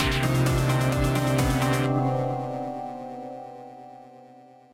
When you repeat the sound again and again it will be a good ( but not fantastic :D ) sound for a start (Example :a racestart ,a Countdown ). :D
GetReady, Song, Music, Sound, Selfmade